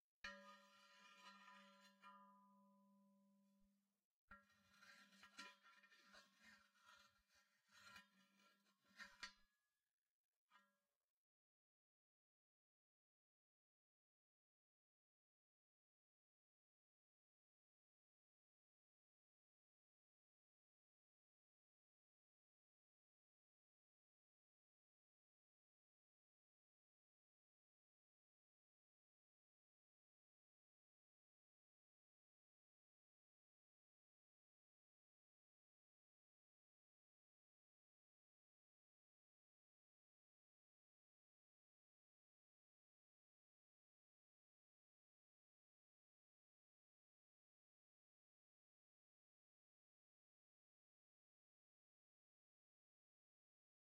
Dragging metal stick on a steel wheel
squeak, scratch, drag, creep, metal, screech, metallic, wheel